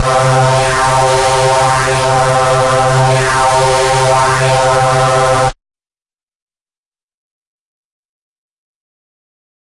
multisampled Reese made with Massive+Cyanphase Vdist+various other stuff
distorted, hard, processed, reese